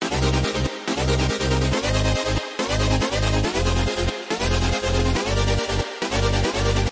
This is a Future Bass chord progression made with 3x osc, the chords are inversions of Cm, Ab, Fm and Gm in that order, you can do whatever you want with this :)